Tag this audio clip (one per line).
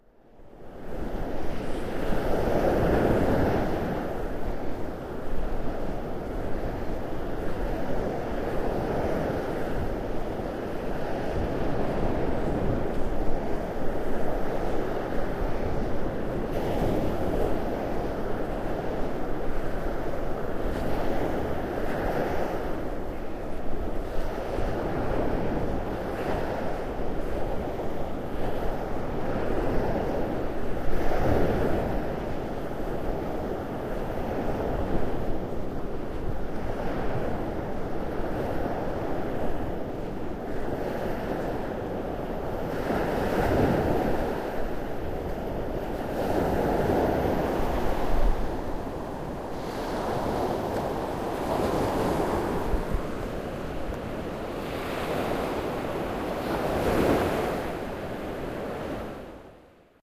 water
sea
field-recording
nature